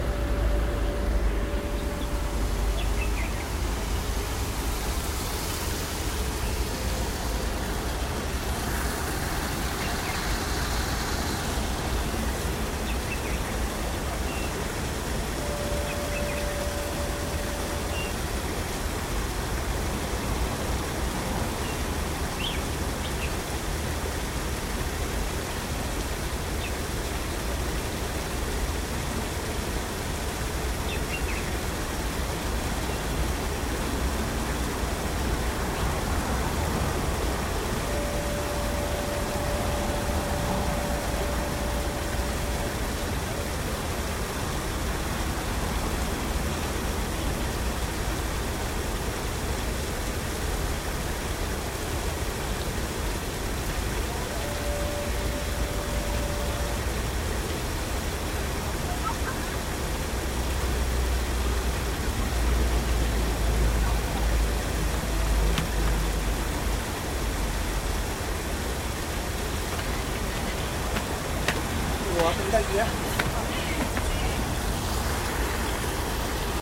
Hong Kong Chi Lin nunnery garden
nunnery, surrounding, garden